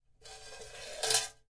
A metal compartment's door sliding open.